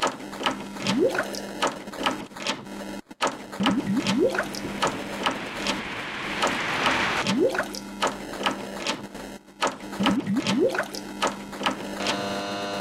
LoopSet 02.05-Busy Rhythm
Well... Throw everything in including the kitchen sink.
I already had a fridge sound on the previous one. SO now I added a processed washing-machine sound. Which I stretched to fit the tempo and then processed further using overdrive and rate-reduction effects.
Sounds used: